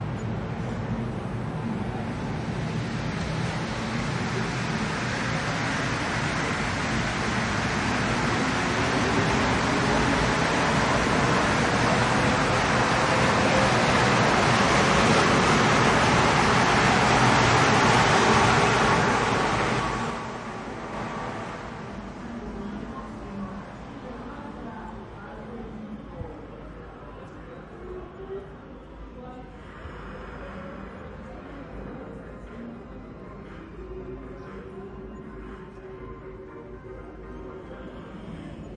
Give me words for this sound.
metro 02 - parte
subway leaving
Alfredo Garrido Priego
ambiance, CDMX, city, field-recording, spanish, subway, train